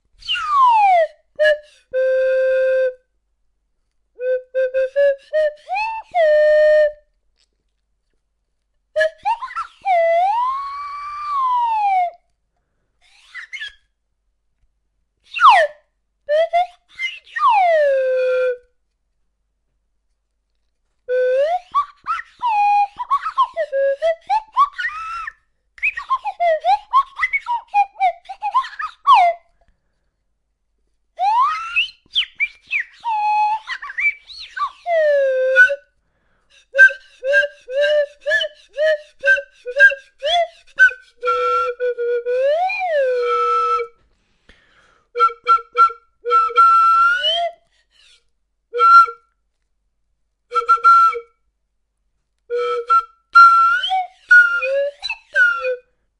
I dont know the name of this instrument but it is a flute that you control with a stick while blowing in it. Often heard in older cartoons :)
Enjoy and it would be fun to receive links with your application of the sounds!